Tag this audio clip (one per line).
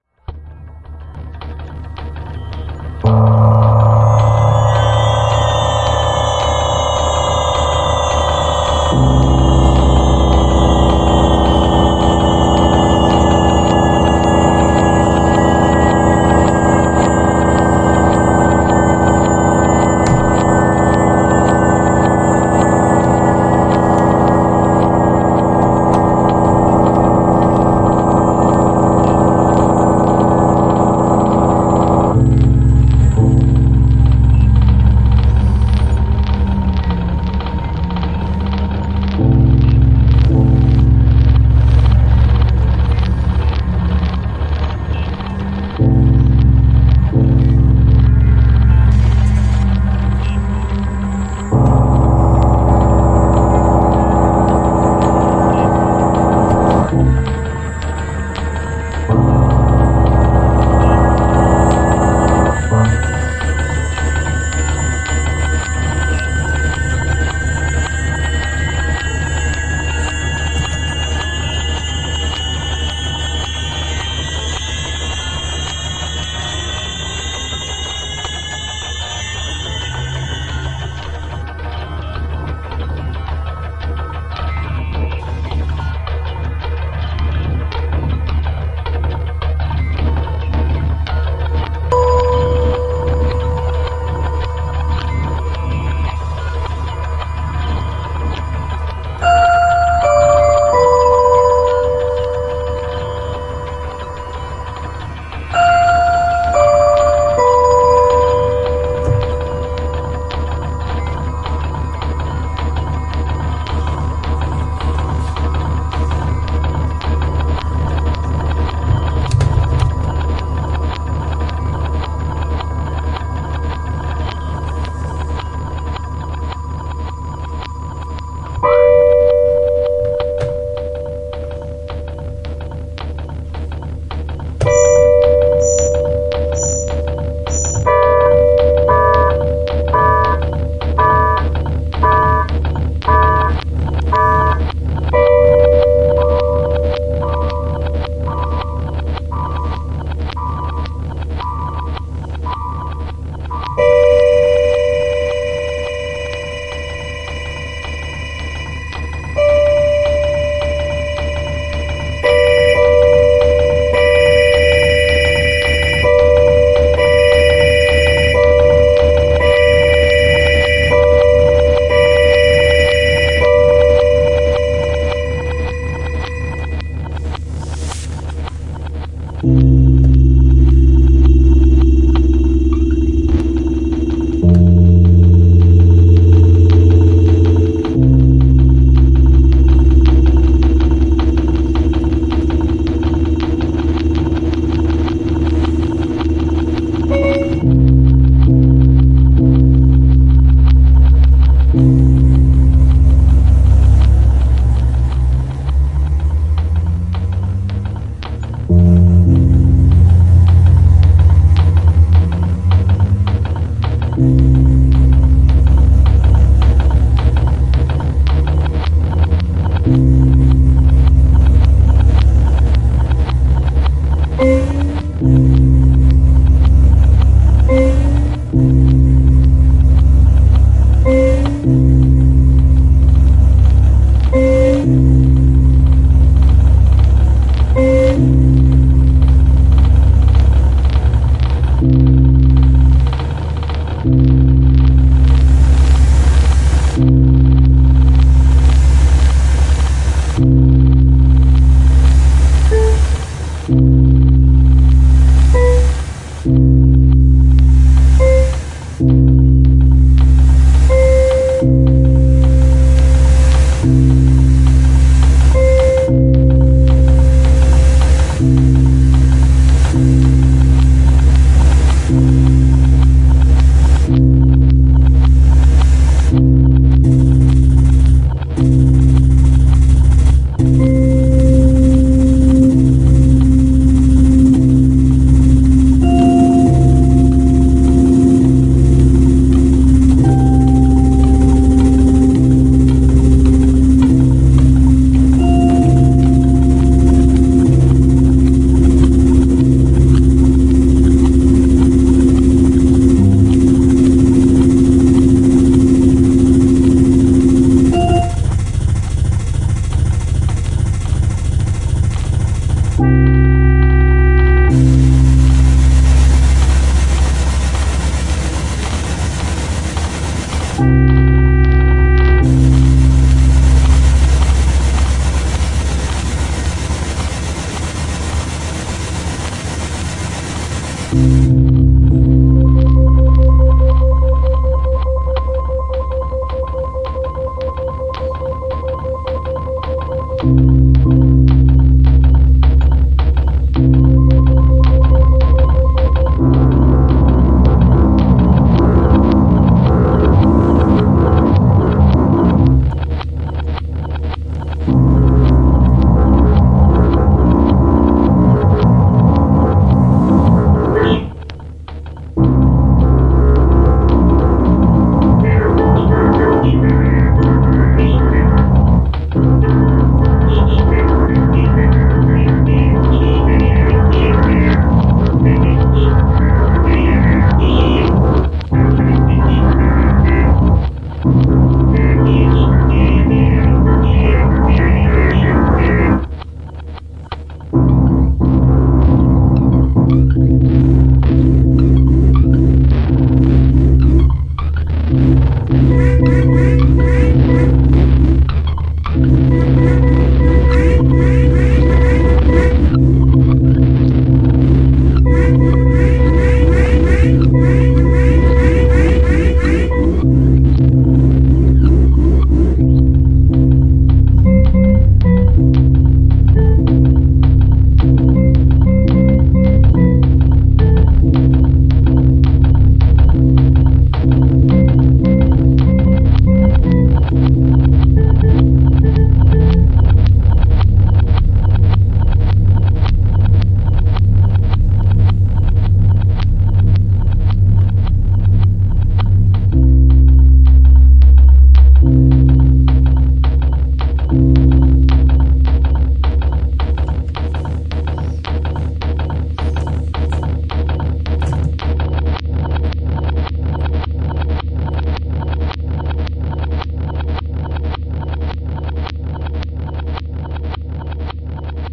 abstract
fatal
musique